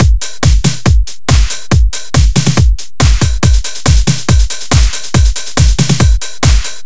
Dance drum loop
drums from my WIP made using FL Studio
140, 140-bpm, beat, dance, drum, drum-loop, loop